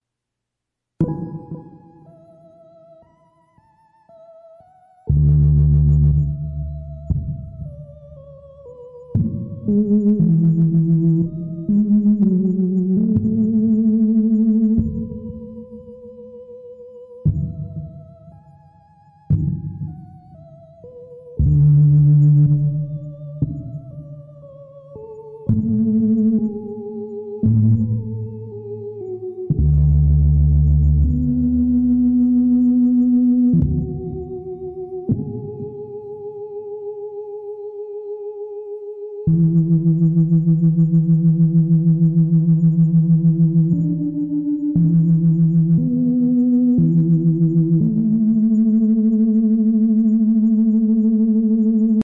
free use
phased synth sample with Scheps 73 preamp distortion